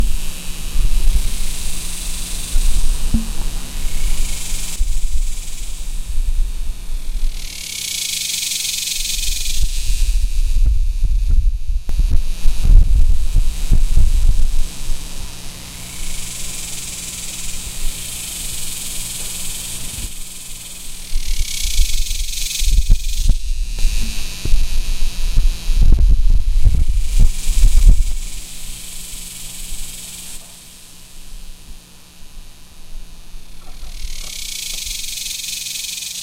Cicada summer
Summer sounds of Cicadas in the trees. I was standing in my backyard recording the Cicadas. You may hear the wind blowing in the mic.